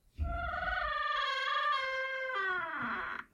LU Shuai 2014 2015 OpenTheDoor
Made using Audacity
recording my with dynamical microphone, open the door, 3s
apply noise reduction
apply normalize
////Typologie: contenu varié
////Morphologie:
-Masse:groupe nodal
-Timbre harmonique:acide
-Grain:lisse
-Allure:pas de vibrato
-Dynamique:attaque graduelle
Door, Horrible, evil